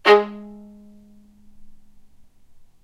violin spiccato G#2
spiccato, violin